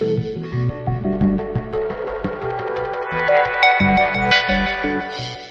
Synth Loop 39 5 - (174 BPM)
This sound or sounds was created through the help of VST's, time shifting, parametric EQ, cutting, sampling, layering and many other methods of sound manipulation.
electro, dubstep, dub, production, samples, music, cool, studio, Synth